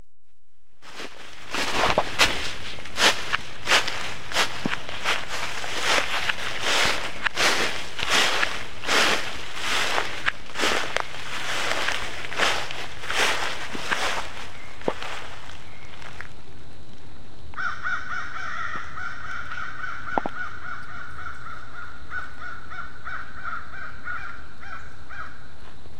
walking in the woods in Johnson City, NY, Sept 28, 2015
recorded on a pocket MP3 player with built-in mic, I added a limiter, no other processing
some crows joined in at the end